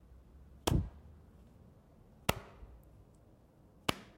Sonido de fruta golpeando
golpe, golpes, fruta